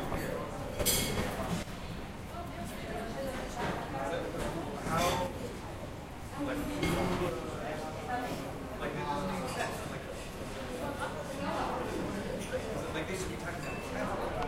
cocina paisaje sonoro uem